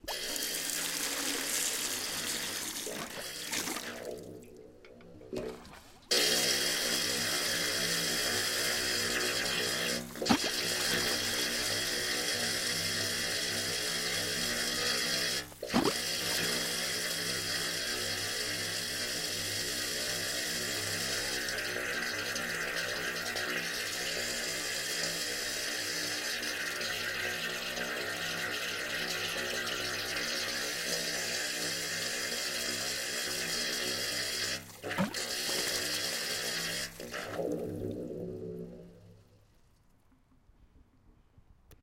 Recording of a Russian water pump, still used as the water supply for my friend's dacha where I was recently on holiday.
Unprocessed, recorded with a Zoom H2.
metallic, water, pump